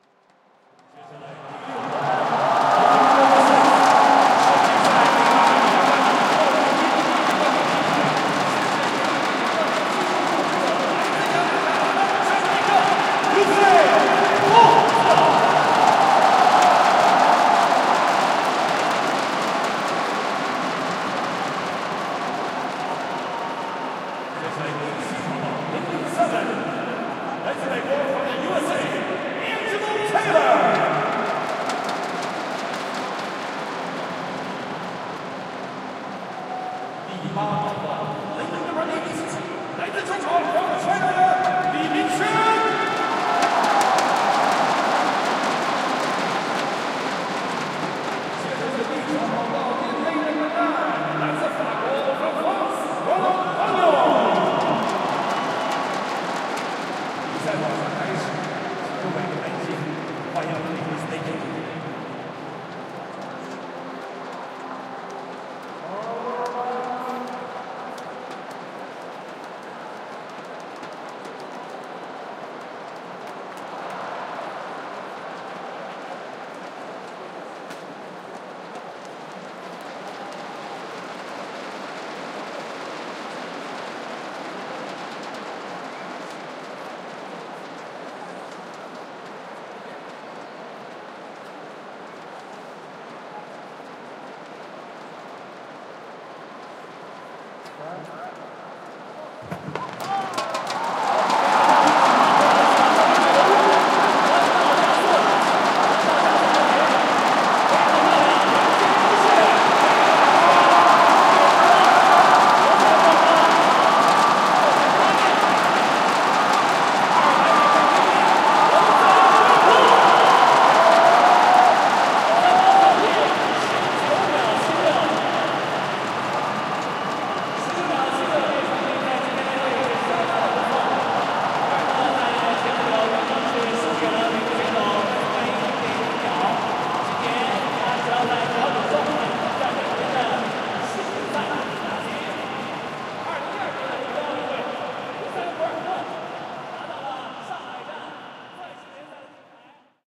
Diamond League Track and Field event. Usain Bolt wins the 200 meter race. Shanghai Stadium
200, applause, audience, Bolt, cheer, cheering, cheers, clapping, compete, competition, crowd, fast, field-recording, gold-medal, meter, Olympics, race, racing, run, running, Shanghai, speed, sport, sports, sprint, stadium, track, track-and-field, Usain, win